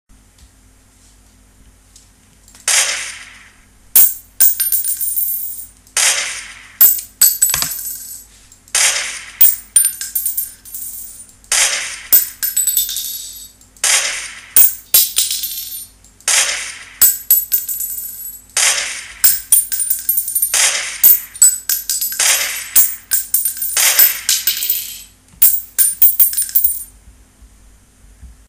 Shotgun Firing with Casings
Sound of a shotgun being fired in a small room. After each shot a 30.8 Cal Winchester bullet casing hits a tile floor.
Bullet, Casing, Shotgun, Tile, Winchester